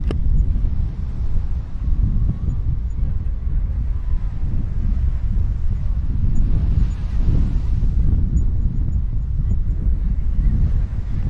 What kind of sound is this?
vent ed
Wind at the beach of Prat of Llobregat. Recorded with a Zoom H1 recorder.